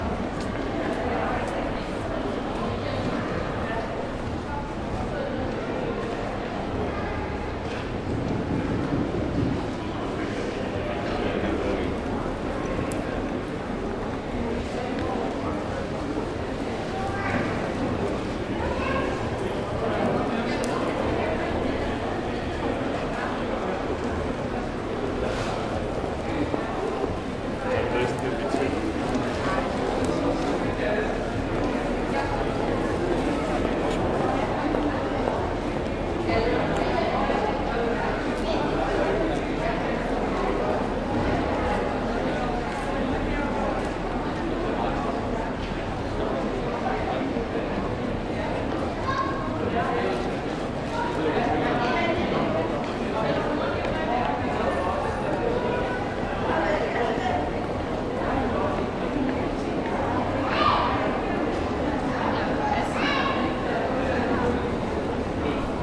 Aarhus Railway Station waiting hall ambience
Ambience at the waiting hall at Aarhus Railway Station. The hall has a high ceiling and is much longer that wide. Many people just pass through while others are waiting. Faint voices can be heard along with footsteps.
Recorded at 11:30 the 2018-02-03 (Feb) Danish local time with a Tascam DR-40.
railway-station, footsteps, ambience, voices